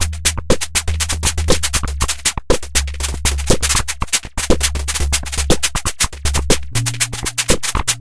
A four bar four on the floor electronic drumloop at 120 BPM created with the Aerobic ensemble within Reaktor 5 from Native Instruments. Experimental and broken electro loop. Normalised and mastered using several plugins within Cubase SX.